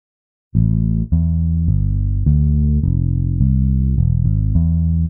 Bass Sound
Bass, Sound, Latin, Instruments